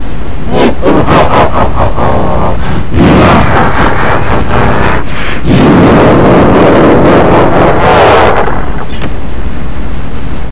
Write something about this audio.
My Evil Laugh (Really Loud)
Made with Audacity. Copy and paste it 4 times. The first track should not been changed. Change the pitch to +3 on the second track. Change the pitch to -3 on the third track. Change the pitch to -7 on the forth track. And change the pitch to -9 on the fifth track.
Devil, Evil, Halloween, Horror, Laugh, Monster, Scary